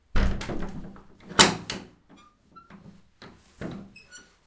Church Door (Exterior) Throwleigh
Heavy old wooden church door, metal latch. Recorded with Zoom H1.
open, heavy, shut, old, Door